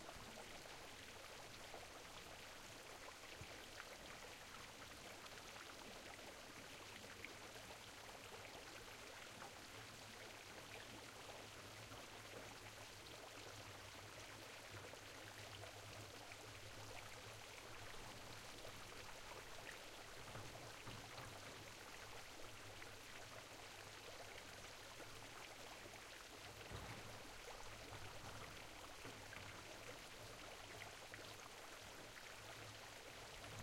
080910 01 water river
river over cascade small waterfall
river, water